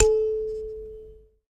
SanzAnais 69 A3 minibz
a sanza (or kalimba) multisampled with tiny metallic pieces that produce buzzs